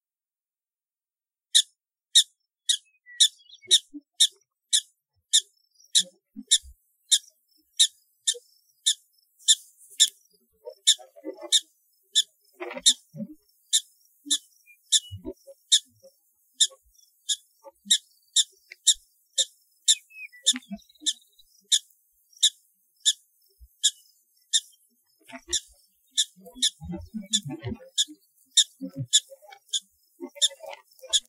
Green Mt Prairie Dog
This little guy was weary of us hiking thru their prairie dog village. He wanted to make sure everyone knew we were coming.